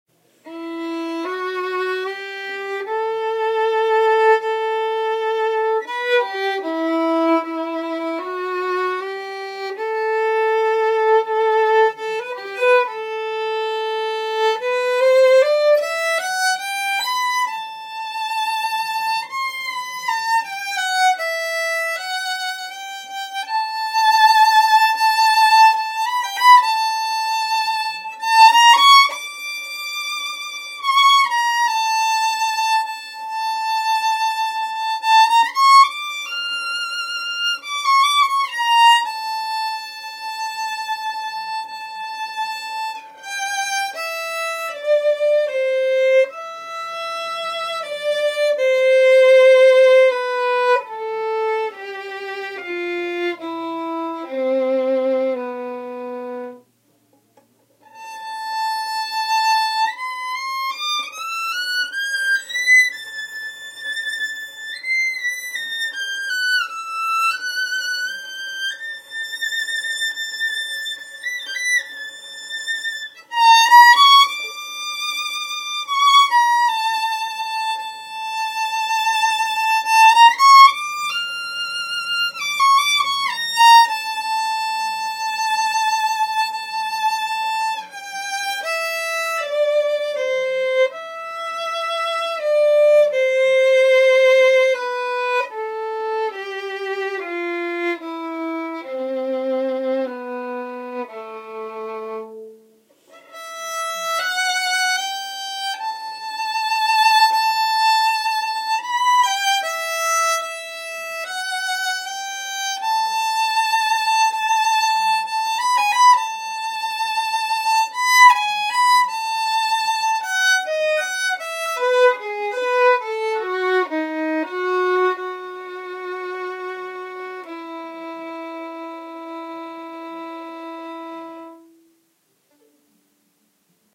Sad Violin
A short demo of The Violin Solo from the 3rd Movement of the St. Paul's Suite by Gustav Holst.
This can be perfect to use for a sad and funeral scene for a movie or a podcast story.